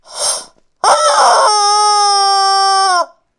rubber chicken09
A toy rubber chicken
scream, cartoony, toy, honking, honk, screaming